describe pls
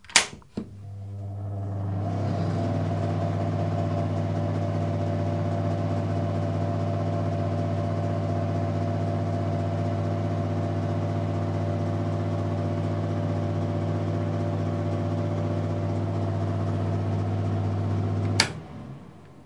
Extractor Fan 03
ac, fridge, refrigerator